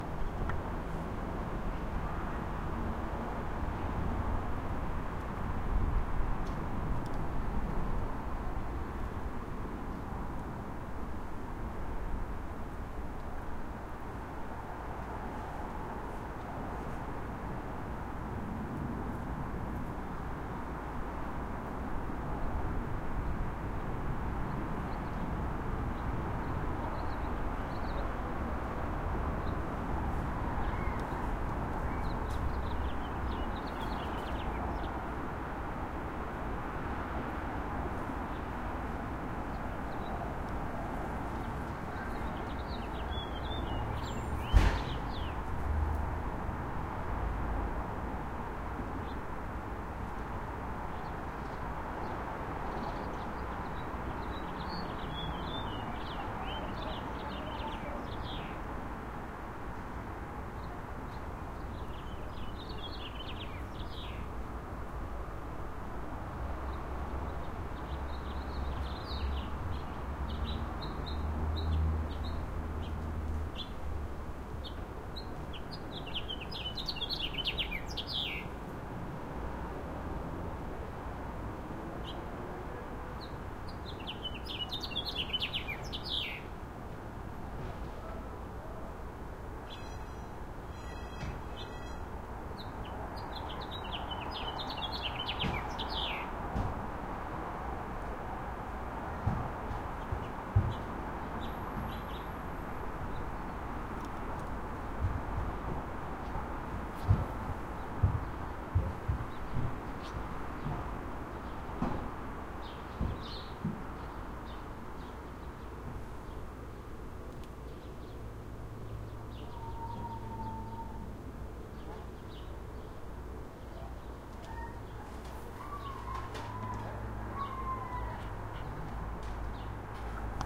Residential Air Distant Traffic
toronto, distant, traffic